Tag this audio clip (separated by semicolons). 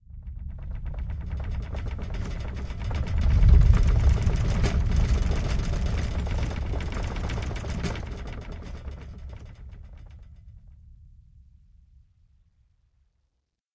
Earth-tremor-indoors; Earth-tremor-inside-house; Earth-tremor-interior